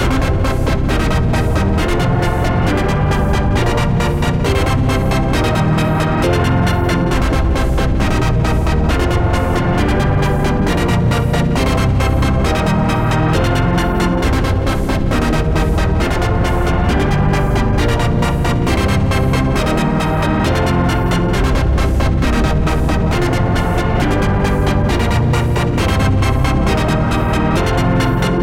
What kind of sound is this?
2406151934vs-1defpres201215
Edited a default preset in BK-Synthlab's free "VS-1 Oscilloscope" synth and hooked it up with a free midi sequencer vst (hyperion) and placed ThrillseekerVBL on the master.
This was done using headphones back then so the sound may not be optimal on some playback devices.
chord, free, lead, loop, retro, synth, test, vs-1, vsti